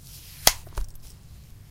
17 taśma pisk
records, oneshot, punch, zoom,